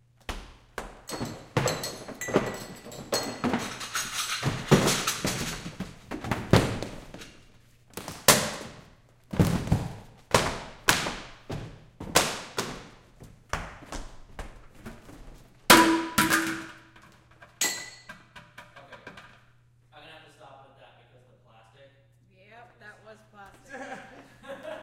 room ransack 2
A recording made of the stage manager and director pretending to be nazi officers searching for valuables in an apartment on the set of a production of The Diary of Anne Frank.
Stomping, knocking things over, pushing things around. Opening and closing drawers, but not actually breaking anything.
This recording was cut short as a plastic bowl was knocked over, making a distinctly anachronistic sound...
(recently renamed)
bowl, frank, tableware, stomp, crash, clatter, anne, violence, silverware, glass, ransack, plastic